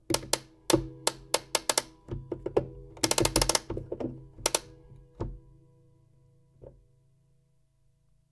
clock-winding-01
This is an old (pre-1950) Junghans wall-mounted clock. In this clip, the spring mechanism is being wound. Recorded in living room.
wooden, winding, tuned, 3, mechanical, clock, enclosure, bar, chime, wall-mounted